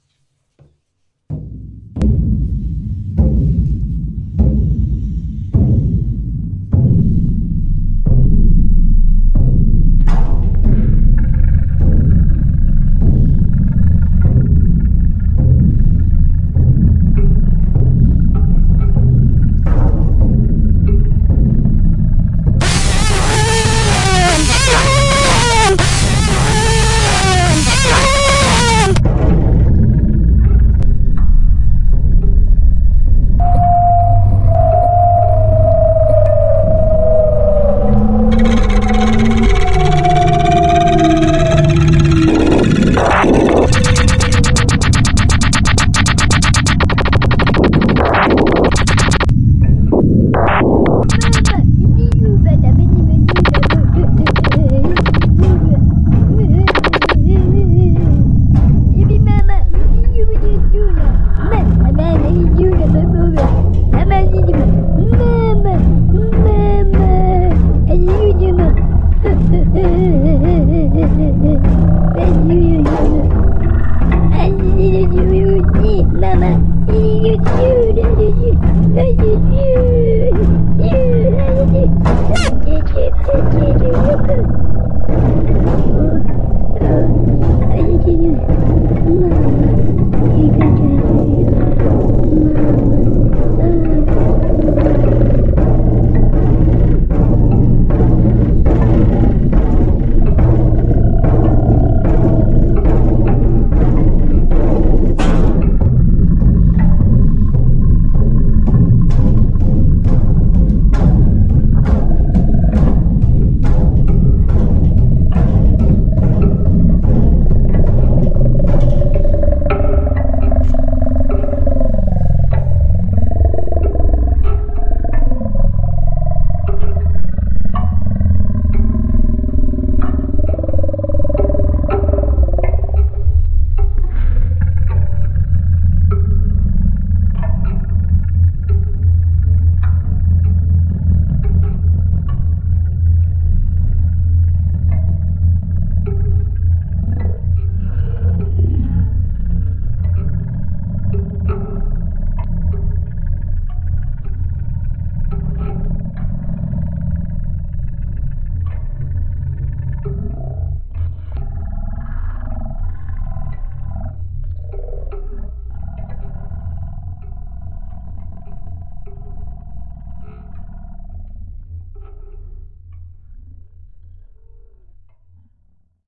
This is a mix of a)a new record based on my home msde drums and b) clips from my older jobs. It's a remider of how peaccefully we live while the poor Syria people live in hell. The voice of a child is in fact my own voice, which was analog processed in my old 20 kilogram heavy Revox.